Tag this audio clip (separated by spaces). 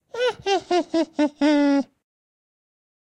Funny male laugh